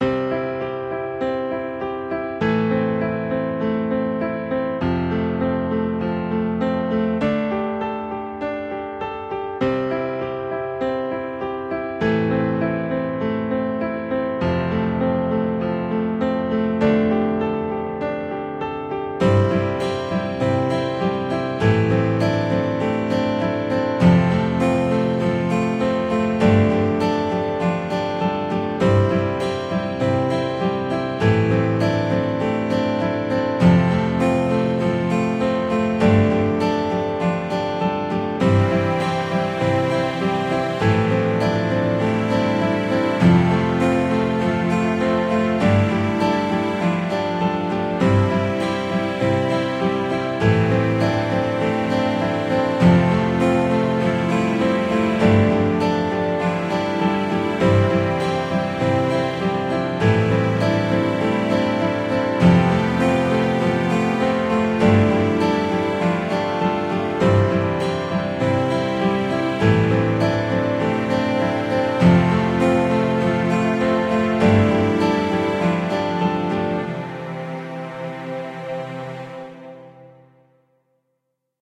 free music for projects - made with vst instruments
strings, trailer, sample, instrumental, radio, background, chord, guitar, clean, send, interlude, stereo, podcast, radioplay, movie, broadcast, instrument, music, pattern, jingle, acoustic, mix, piano, nylon-guitar, intro, loop, sound, melody